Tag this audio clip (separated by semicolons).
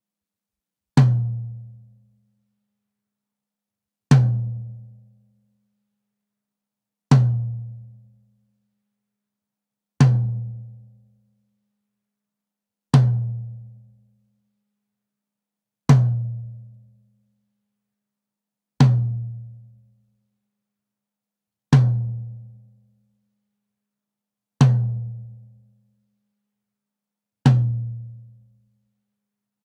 drum; drums; percussion; hit; tom